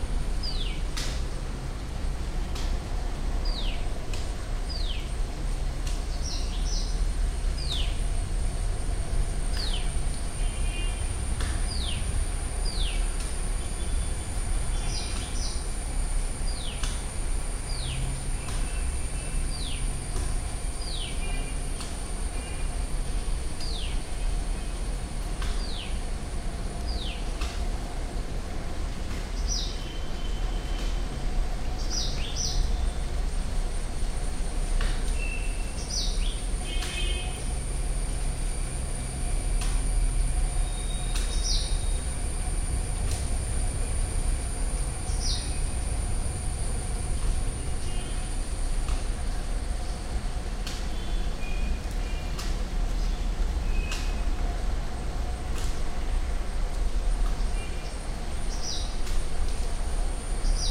Semi-urban ambience 2
Semi-urban ambience recorded using Samson Go Mic. Sounds of wind, drizzle (light rain), vehicle horn, birds chirping and woodcutting can be heard in distance.
Recorded by Joseph
rain, semi-urban